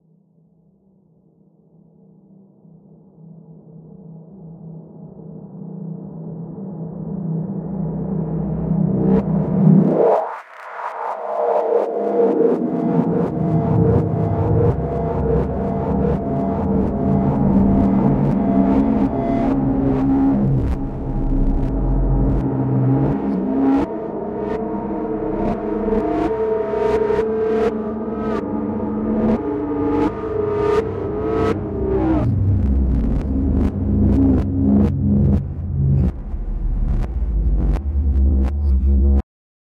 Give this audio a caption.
Unseen company within a fog bank. Created with twin2 & collision
[BPM: 62.5]
[Key: inharmonic chromatics]
Atmosphere, Cinematic, Company, Creepy, Dark, Death, Delay, Detune, Detuned, eerie, Evil, Fear, Fog, Fog-Bank, Horror, Horrorscape, Inharmonic, Mist, Revenant, Reverse, Scary, Shade, Stereo, uncanny, Unforseen, Unseen, Unseen-Company, Visitant